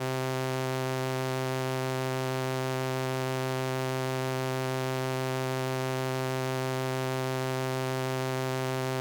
Transistor Organ Violin - C3
Sample of an old combo organ set to its "Violin" setting.
Recorded with a DI-Box and a RME Babyface using Cubase.
Have fun!
70s, analog, analogue, combo-organ, electric-organ, electronic-organ, raw, sample, string-emulation, strings, transistor-organ, vibrato, vintage